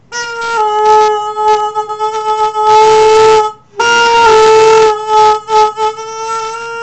Noise Hunting horn